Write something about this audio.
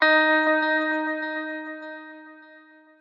Lead Hit 4th
These sounds are samples taken from our 'Music Based on Final Fantasy' album which will be released on 25th April 2017.
Hit, Lead, Music-Based-on-Final-Fantasy, Sample, Synth